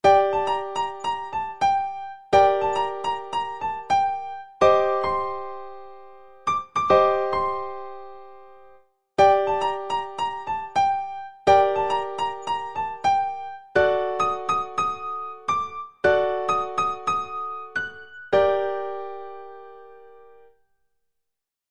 14-Armonización de una melodía en escala menor con las funciones principales (G)
Piano, Midi, Chords